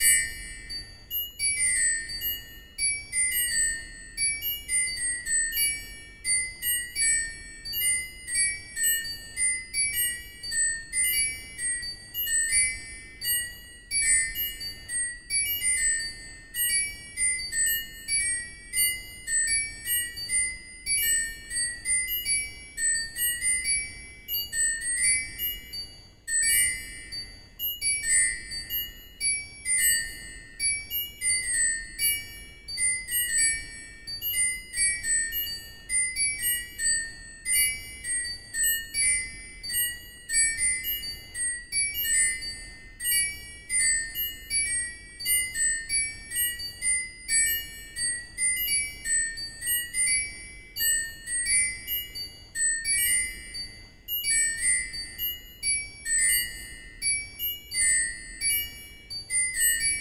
several spoons (1'00") OLD
This began with one recording of a metal tablespoon being struck with a second metal spoon. I then trimmed the hardest part of the original attack. Next, I created five additional spoon recordings, each a pitch-shifted and time-shifted version of the original (+100 cents, +200, +400, +800, +1600). I set each of these on a loop, so they would each repeat at a different rate (based on their new length). I did not use normalization on this because despite the visible headroom on the file, any increase in the gain brought out unwanted room noise. I prefer the headroom over the noise.
bell, chime, ding, loop, math, MTC500-M002-s14, ring, ringing, tablespoon, windchime